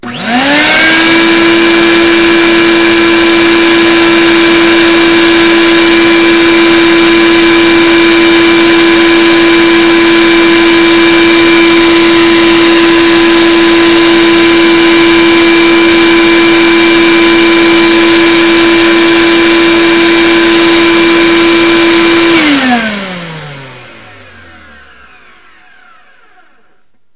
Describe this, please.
just a clip of my shopvac while I was vaccuming my studio :)